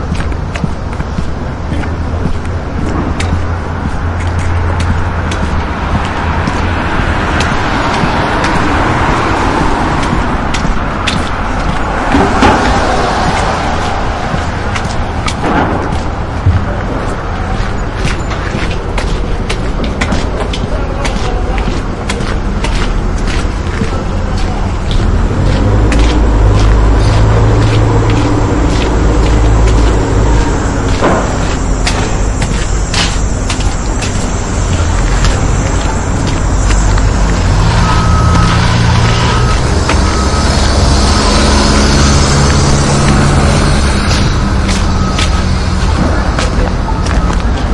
Footsteps walking past a construction site on a busy road.
equipment, site, construction, demolition